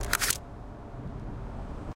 parking meter ticket coming out of machine
meter, parking, ticket